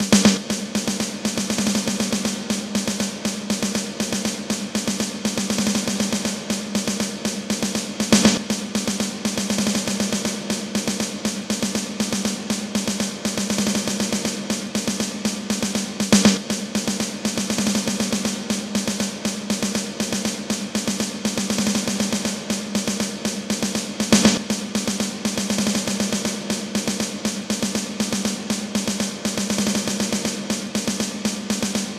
amy beat
Amy, movie, solder